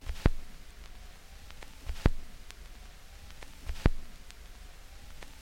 Clicks and pops recorded from a single LP record. I carved into the surface of the record with my keys, and then recorded the sound of the needle hitting the scratches. The resulting rhythms make nice loops (most but not all are in 4/4).
analog, glitch, loop, noise, record